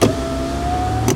variation 3) I press and hold the button to open or close my car window, then I release it.
Recorded with Edirol R-1 & Sennheiser ME66.